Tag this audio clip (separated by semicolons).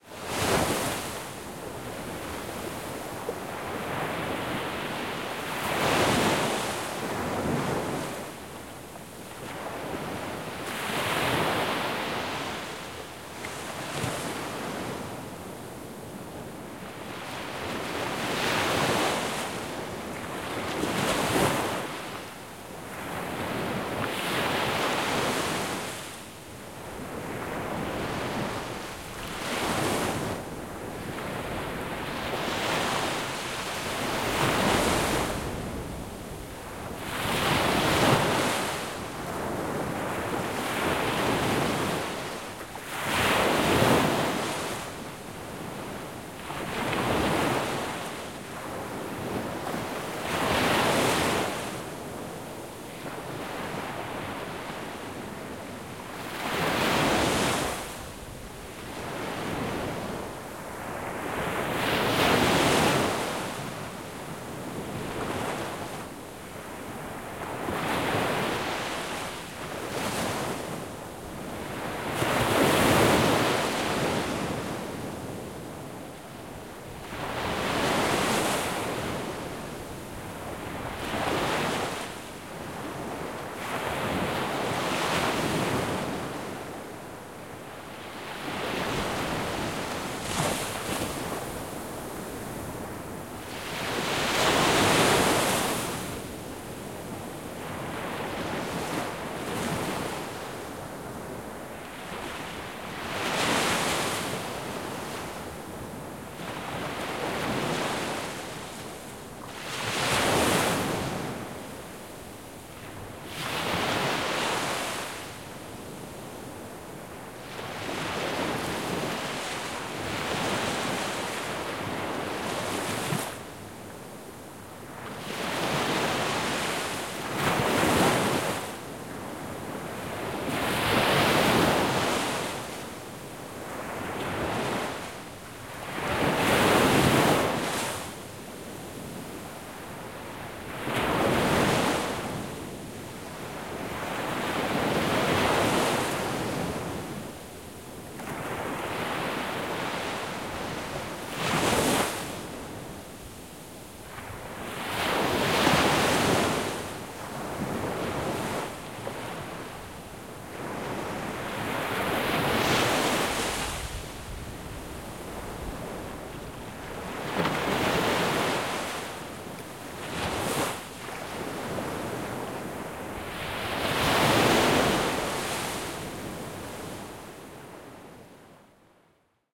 ambiance; ambience; ambient; beach; field-recording; fieldrecording; loud; rocking; sand; sfx; storm; summer; thailand; water; waves